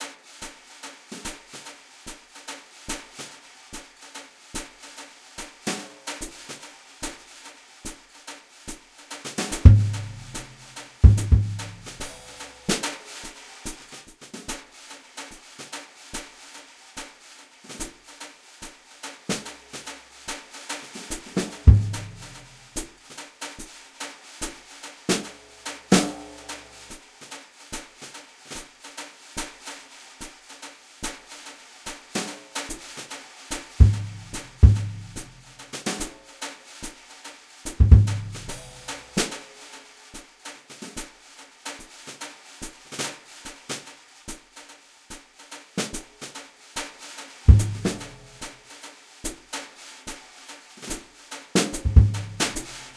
A long Jazz brush-loop at 145 bpm
brush, loops, drum, acoustic, jazz